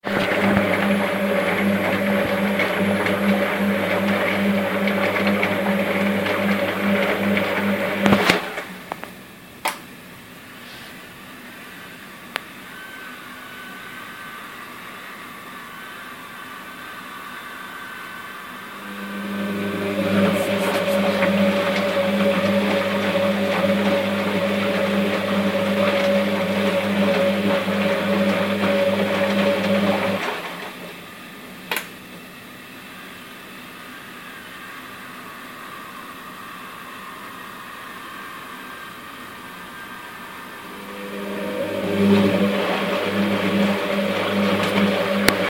It's a washing machine recording